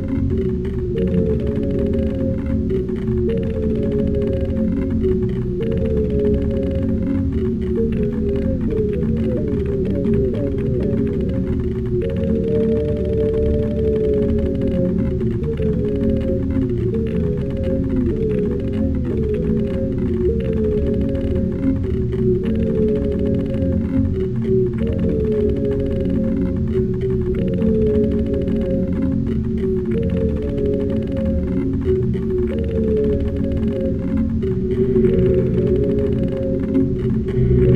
Granular Synthesis Crazy Sound
Ambience Field Recordings, Used granular sythesis and
crazy, excerpt, Granular, madness, sound, Synthesis